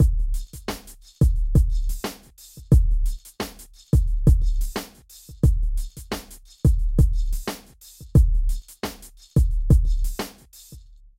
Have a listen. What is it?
FL Studio beat. Good mind flex joint.